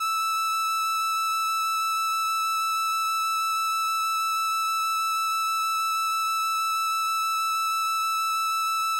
Transistor Organ Violin - E6
Sample of an old combo organ set to its "Violin" setting.
Recorded with a DI-Box and a RME Babyface using Cubase.
Have fun!
transistor-organ, raw, vintage, combo-organ